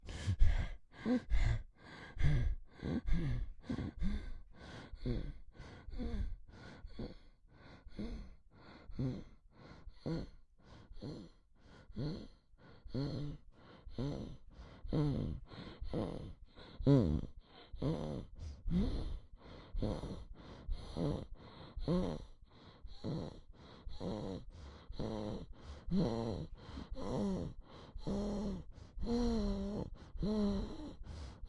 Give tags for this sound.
Sick weak

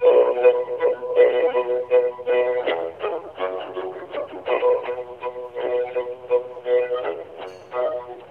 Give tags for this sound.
glitch violin recording

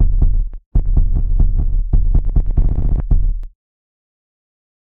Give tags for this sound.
glitch kick kick-glitch-loop

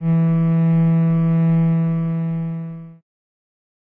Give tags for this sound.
cello strings synth

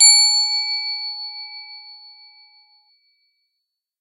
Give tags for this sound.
metal ding wind-chime

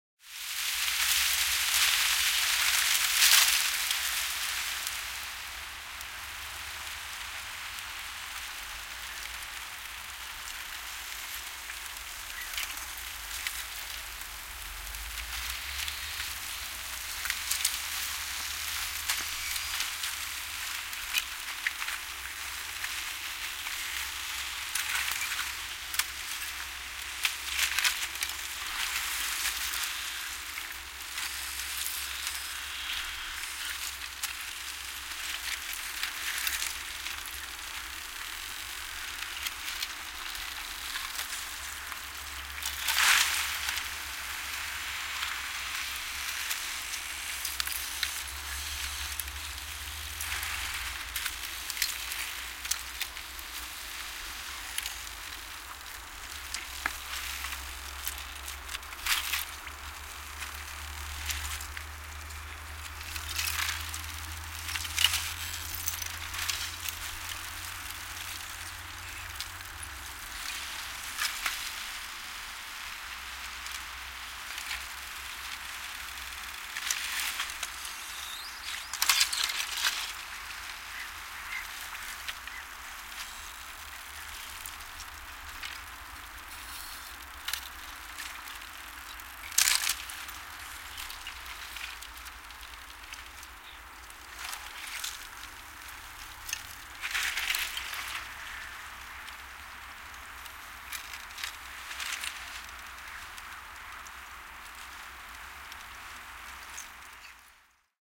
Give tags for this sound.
Field-Recording Tehosteet

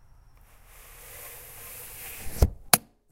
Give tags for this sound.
click lock night slide window

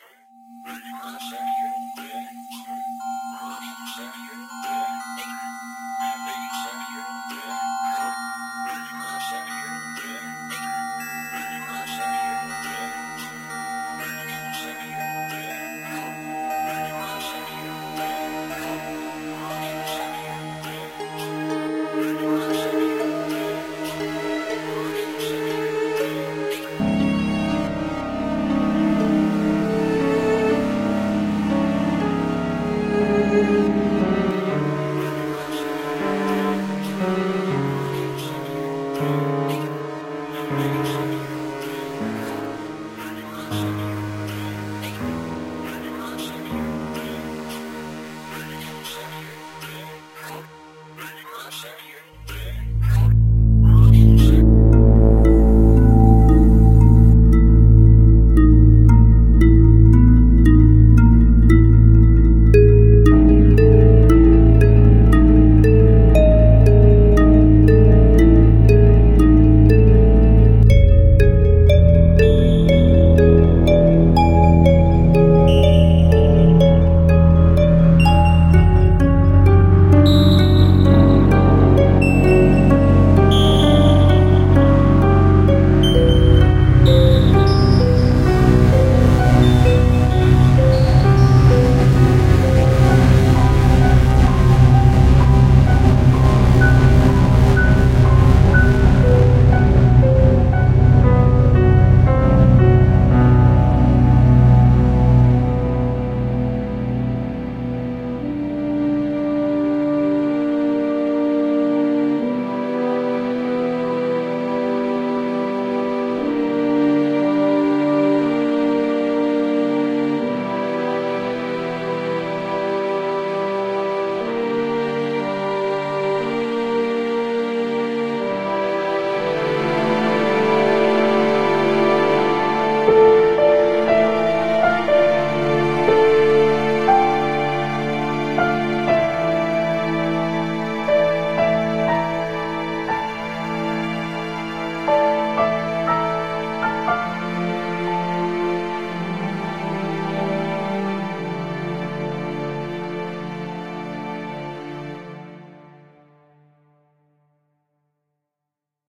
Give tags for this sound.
misterious mystery piano

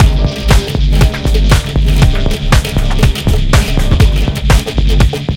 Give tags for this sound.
collab tension multiple

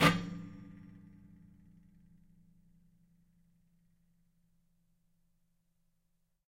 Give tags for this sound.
rub wood metalic scrape percussive acoustic spring

